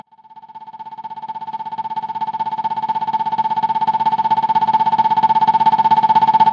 Live - PadSynth fx 01
live recording pad fx
live, fx, recording, pad